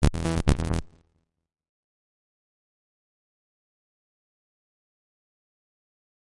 I used FL Studio 11 to create this effect, I filter the sound with Gross Beat plugins.
digital, electric, fx, lo-fi, sound-design, sound-effect